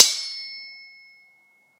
Sword Clash (10)
This sound was recorded with an iPod touch (5th gen)
The sound you hear is actually just a couple of large kitchen spatulas clashing together
clanging, clank, iPod, metallic, ping, slashing, steel, strike, struck, sword, ting